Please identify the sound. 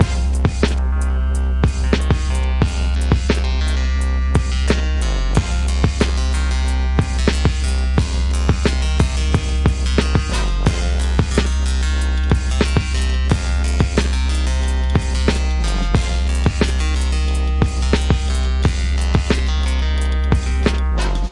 Stringy Lead Loop
A Loop from my OP-1. A stringy like lead with a slow, deep bassline. Vintage drumsamples from different sources. 90 BPM.
It would be nice, if you could write me a message where do you use my sound, so i can brag about it!
You can also find me on: